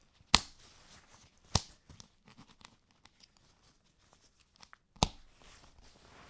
Snap-fasteners19
Here I tried to collect all the snap fasteners that I found at home. Most of them on jackets, one handbag with jangling balls and some snow pants.